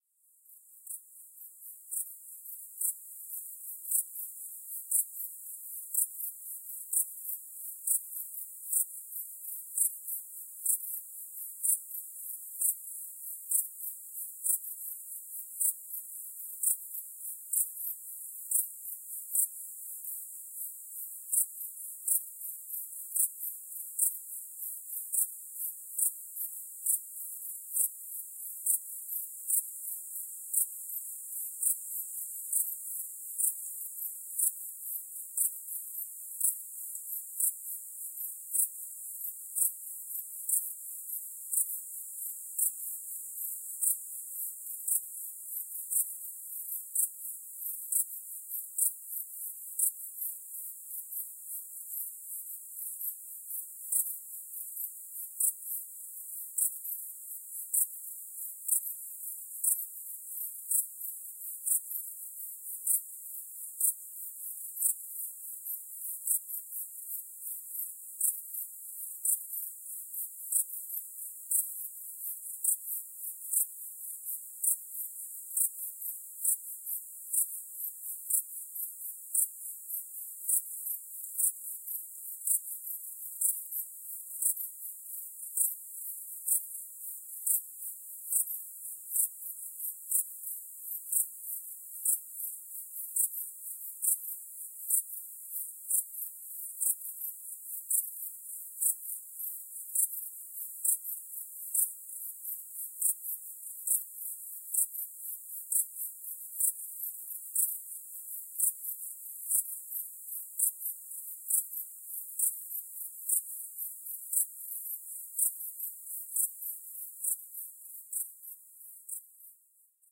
Ambient Wild Track - Cricket Chorus
A stereo recording of a cricket chorus in a field. Recorded during the late hours of the night.
night, chorus, track, crickets, ambience, wild